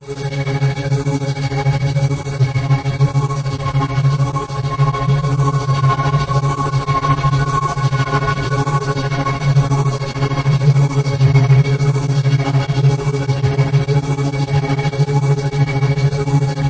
dimensional st*t
A good vibed pad with good dynamics an a cheesy-sound
atmospheric, dark, difficult, space, spheric, texture